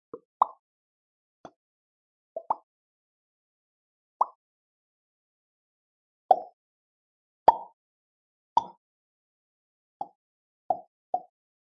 Pops with mouth
[Br] Alguns pops que fiz com a boca.
[En] Some pops I did with my mouth.